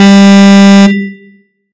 Alarm-04-Long
Alarm to use with a loop